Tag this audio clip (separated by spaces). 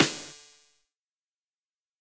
drum experimental hits kit noise sounds